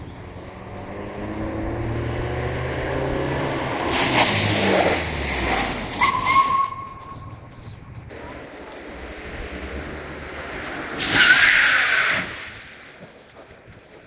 abrupt stopping car with squealing tyres on 'wet ground'. recorded: Nokia N8, datareduced, stereo but not coherent stereo.